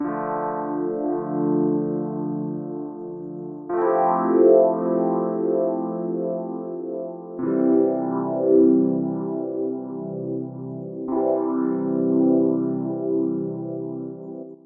Chords with a phaser effect
panning, phaser